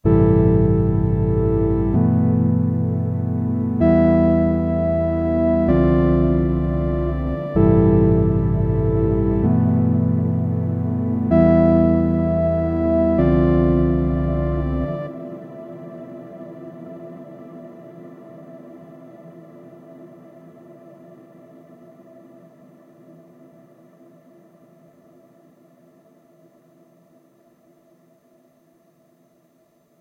Nostalgic A minor chords melody Kurzweil 2500 synth
Chord progression played on a Kurzweil 2500RS synthesizer in a-minor key. The mood is dreamlike, melancholic and nostalgic. The instrument is a mix of piano and strings and ends with a long reverb tail. Could fit a soundtrack or an ambient track.
a-minor, ambient-music, dreamlike, melancholic, reverb, synthesizer-chords